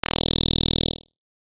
Music Note 4
Tags:
music note sample atmosphere stab musicbox Ace Piano Ambiance stabs Loop 5 this Random samples Recording Recording sound effect Erokia Erokia electronic live three fruity pack dj electronica loops substep Wobbles Wobbles beautiful pretty Dub one shot one LFO effects effects wobble wobble noise dubstep sub
5, Ace, Ambiance, atmosphere, dj, effect, electronic, electronica, Erokia, fruity, live, Loop, music, musicbox, note, pack, Piano, Random, Recording, sample, samples, sound, stab, stabs, this, three